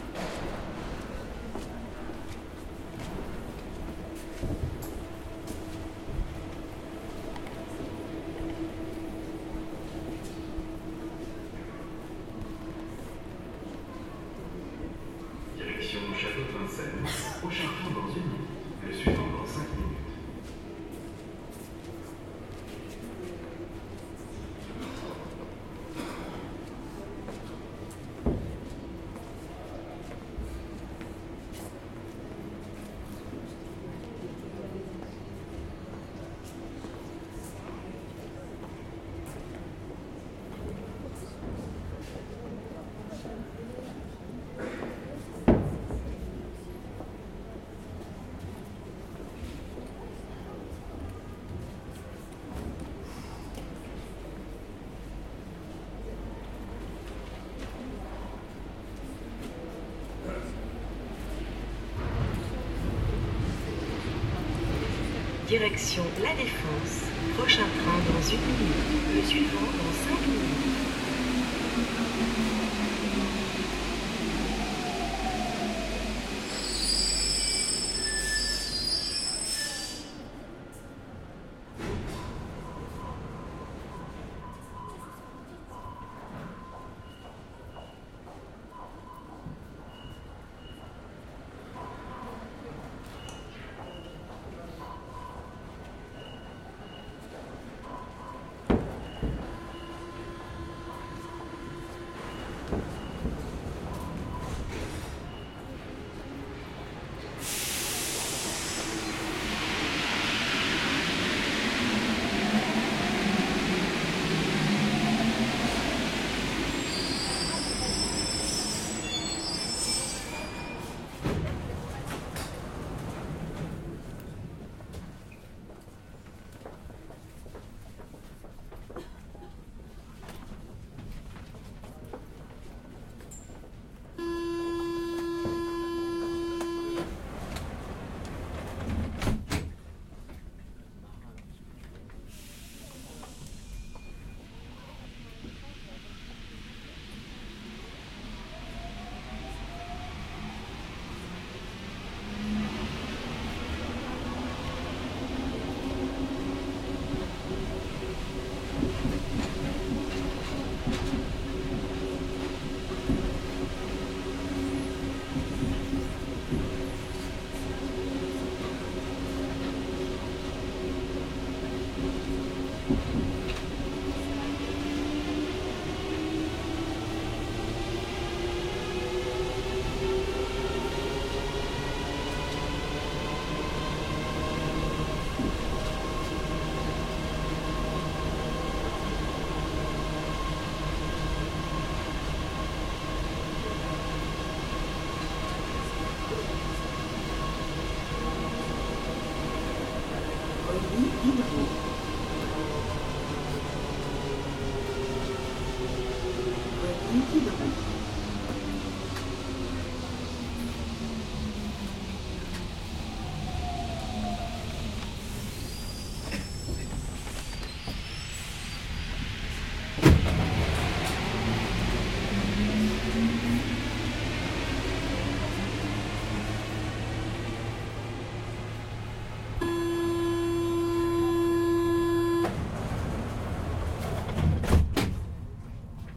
Parisian Metro
It was on the line 1 at Nation
station, underground